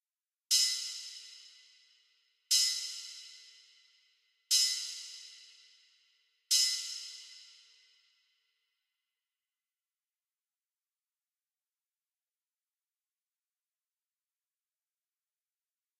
Metallic Chaos Open Hat (120bpm)
Ambient, Factory, Hat, Hi, Hi-Hat, Industrial, loop, MachineDroid, Metal, Metallic, Noise, Open, Robot, Terminator